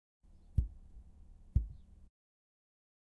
jumping onto the ground